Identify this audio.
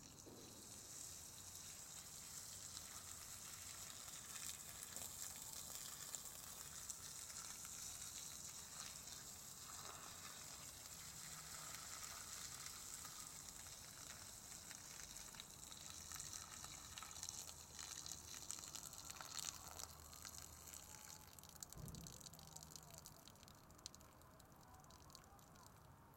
Hydrant pouring(Ambient, Omni)
elements, school-project, water